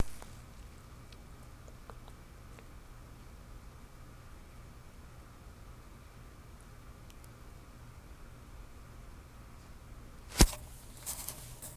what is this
Either an attempt to record a baby's heartbeat before birth or a reluctant fart.